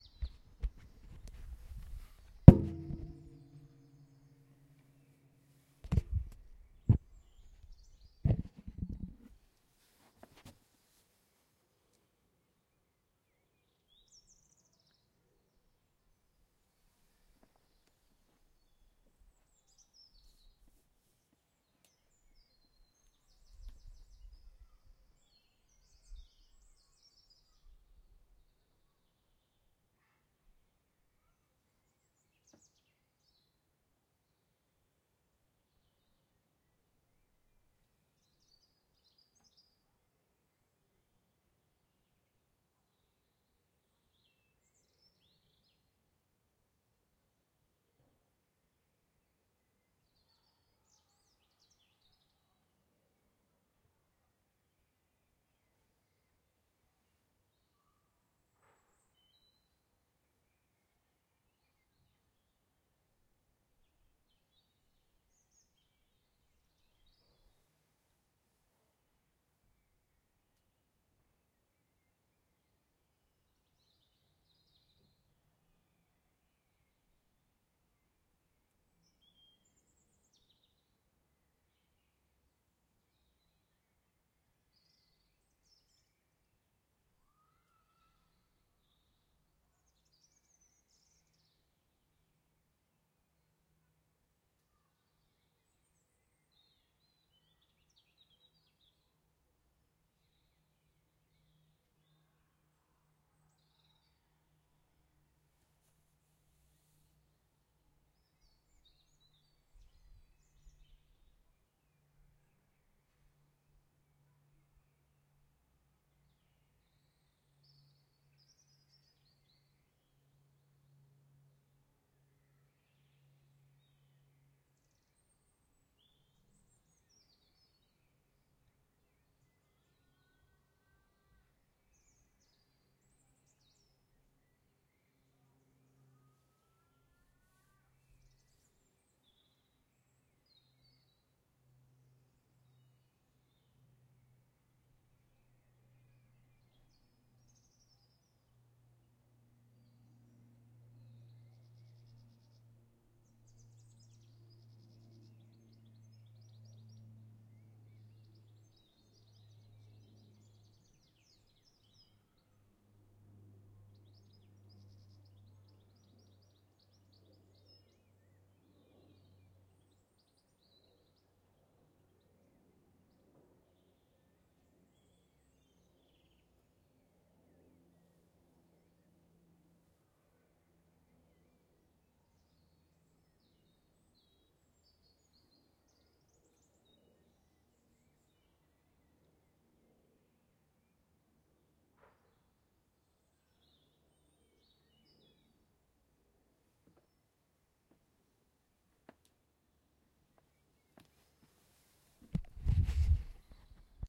Recording of urban garden ambience. Fairly quiet, but lots of twittering birds. From 1.50 to 3.24 a plane comes in from distance and flies all the way past. Recorded on Zoom H1.